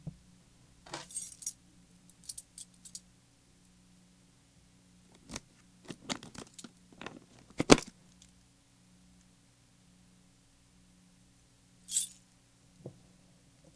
Opening a padlock on a gun box.